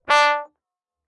One-shot from Versilian Studios Chamber Orchestra 2: Community Edition sampling project.
Instrument family: Brass
Instrument: OldTrombone
Articulation: short
Note: D3
Midi note: 50
Room type: Band Rehearsal Space
Microphone: 2x SM-57 spaced pair
brass, multisample, single-note, vsco-2